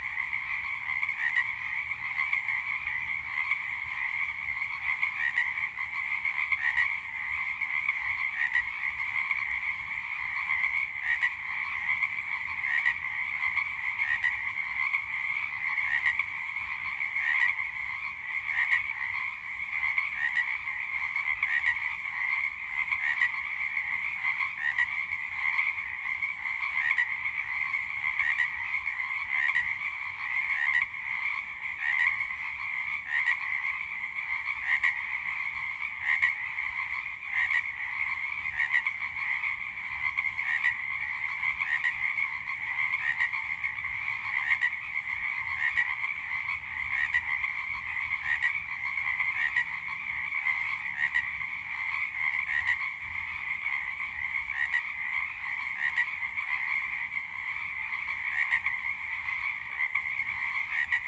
Many Frogs at Night in Marsh
Frogs. So many frogs around sundown on a forest trail in Vancouver, Canada. Got in real close to record this with my phone. Slap a bunch of reverb on this clip and you've got some nice nighttime ambience for a film.
You can use the sound regardless, but I would love to see what people use it for. Thanks, and happy mixing!
nature, nature-ambience, marsh, morning, ambient, water, swamp, field-recording, forest, sundown, late, toads, frogs, twilight, ambiance, night, sunset, early